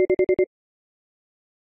5 beeps. Model 1
beep, gui